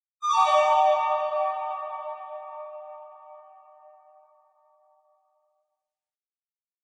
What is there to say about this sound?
UI Confirmation Alert, D1
A pleasant UI confirmation alert sound created by taking one of my didgeridoo samples into a sampler and playing a simple major seventh chord in a descending arpeggiation.
An example of how you might credit is by putting this in the description/credits:
Originally created using Cubase and Kontakt on 5th December 2017.
click, bleep, menu, game, gui, ui, beep, alert, confirmation, interface